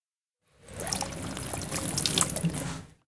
paisaje sonoro fuente de agua
Sónido de la fuente de agua, en la facultad Arquitectura, Arte, Ingeniería y Diseño (Edificio C) UEM, Villaviciosa de Odón.